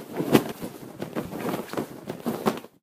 Getting dressed super fast!
Clothes flying everywhere! This person's getting changed in a hurry.
clothing, changed, dressed, dress, rustling, rustle, cloth, dressing, pants, clothes, change, coat, shirt, fabric, changing